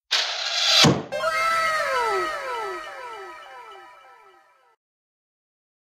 Love at first sight! Arrow hitting target plus anime 'wow'. Simple composite edit and level match.